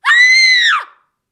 girl scream
Got this great scream sound while trying to figure out how to create dragon sounds